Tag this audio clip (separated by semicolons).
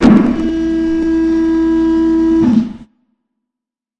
robot; machinery; machine; hydraulic; mech; pneumatic